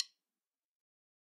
Drumsticks Dave Weckl Evolution click №1 (silent RAW).
one-shot, one, bronze, snare, bubinga, wenge, cymbals, custom, hi-hat, turkish, drum, click, metronome, shot, K-Custom